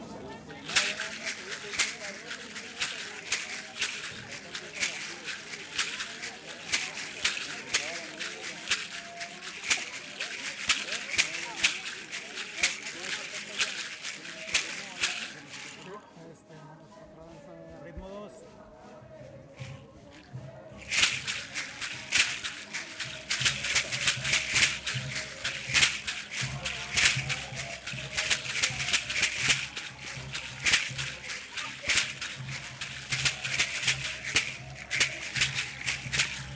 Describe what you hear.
This audio was recorder with a ZOOM F4 + MKH 416, for a mexican documental of the virgin of Zapopan, in GDL,Jalisco.Mex.